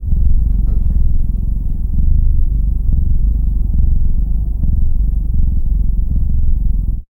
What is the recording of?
Cat Purring
purr, animal, cat